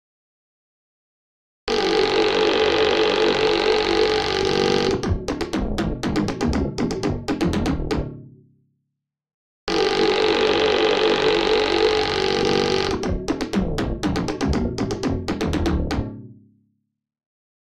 rhythm balls
A rhythmic variation of my balls sample. Part of my Electronica sample pack.
atmosphere, ball, bouncing, drum, electro, electronic, electronica, music, noise, percussion, processed, rhythmic, synth